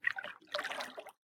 Water Paddle soft 020
Part of a collection of sounds of paddle strokes in the water, a series ranging from soft to heavy.
Recorded with a Zoom h4 in Okanagan, BC.
lake, water, river, field-recording